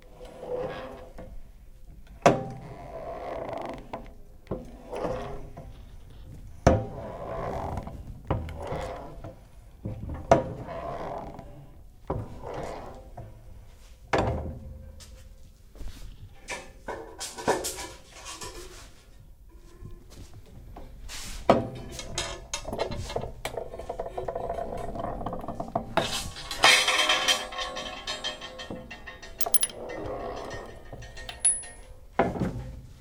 Using a press cutter(don't know the proper name in English) cutting 0.4 cm square stock of metal.